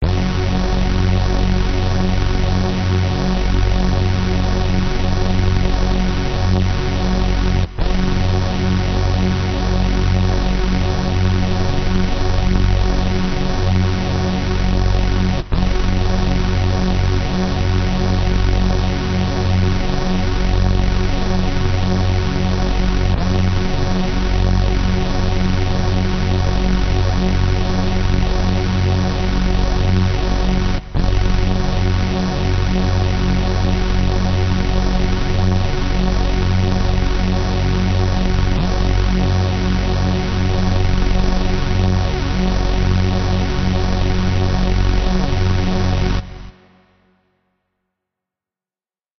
LOW DRONE 002
One of a series I recorded for use in videao soundtacks.